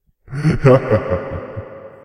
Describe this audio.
Short Evil Laugh 3
Sound of a man quickly laughing, useful for horror ambiance
haunted, drama, laugh, fear, ambiance, phantom, fearful, suspense, terror, horror, scary, evil, creepy, sinister, spooky